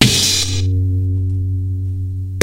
The dungeon drum set. Medieval Breaks
breakcore, dungeons, idm, medievally, dragon, medieval, breaks, rough, amen, breakbeat